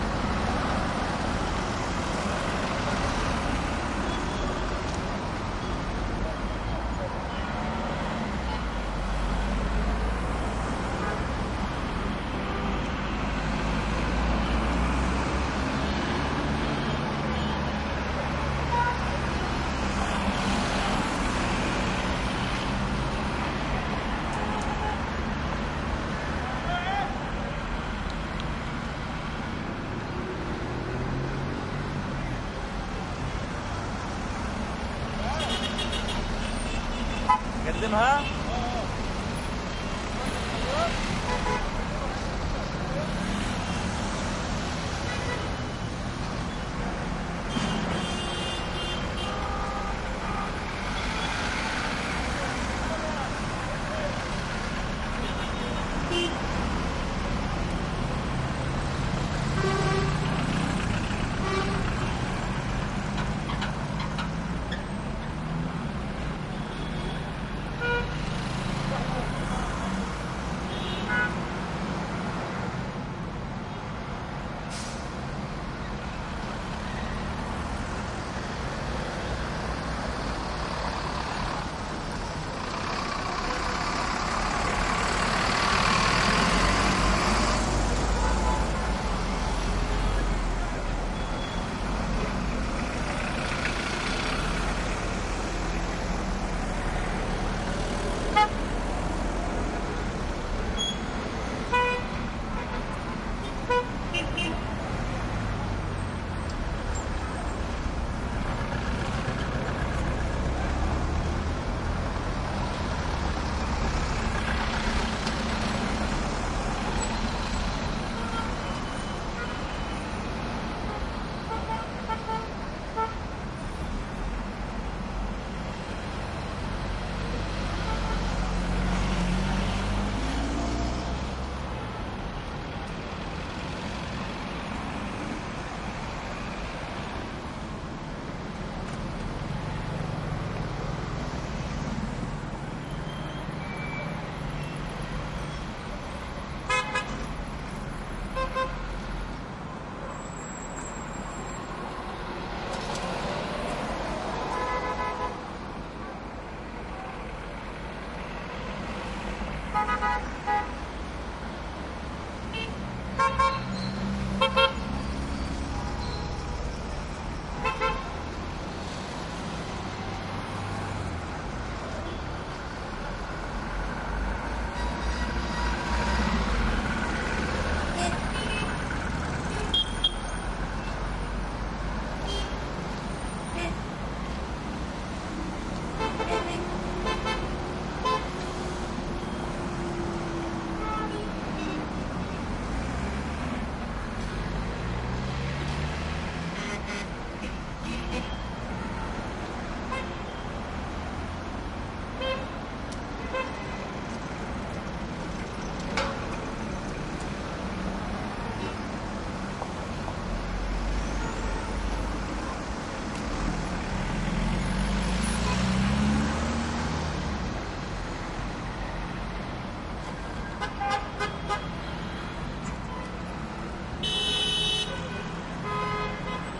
traffic medium Middle East busy boulevard horn honks1 throaty cars moving slowly Gaza Strip 2016
East, horn, medium, honks, Middle, busy, street, city, boulevard, traffic